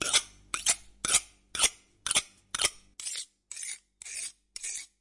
Knife Sharpener
Sharpening a knife in the kitchen for cooking.